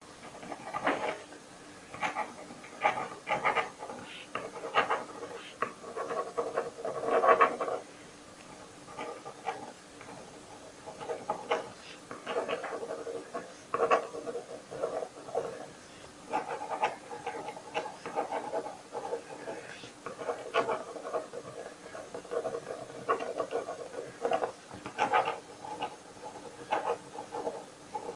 Pen writing on paper record20151219011226
Pen writing on paper. Recorded with Jiayu G4 for my film school projects. Location - Russia.